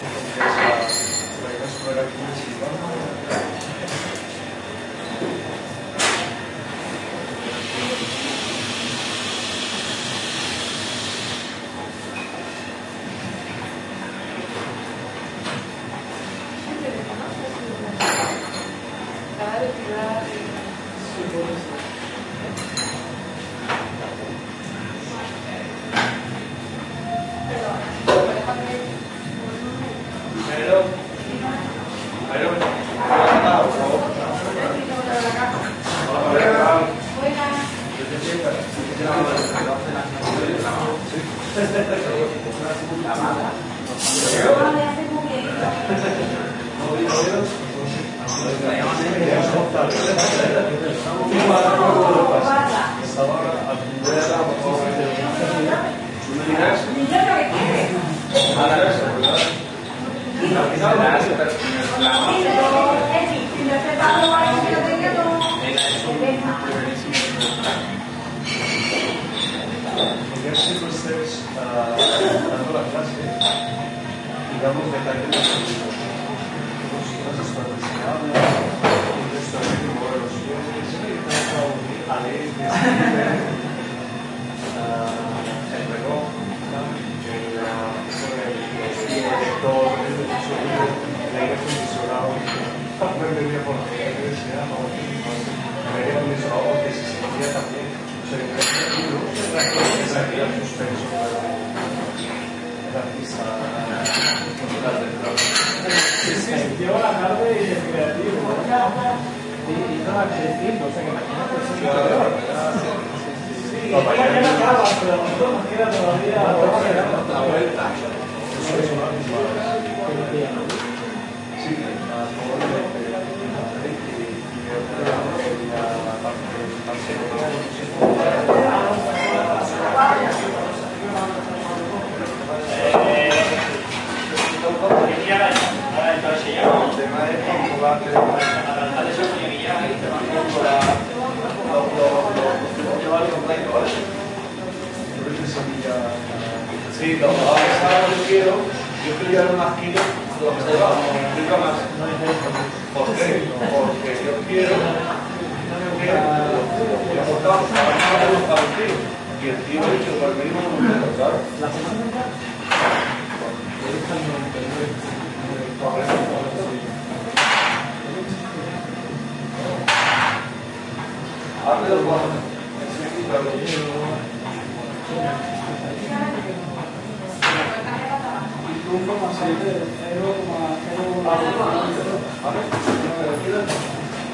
quiet (for Seville standars) bar interior: dishes, cuttlery, non-shouting voices. Recorded during the filming of the documentary 'El caracol y el laberinto' (The Snail and the labyrinth) by Minimal Films. Olympus LS10 recorder.
ambiance, bar, field-recording